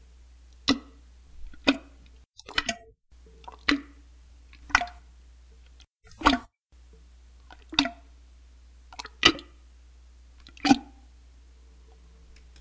Another plunging sound
toilet, bathroom, plunger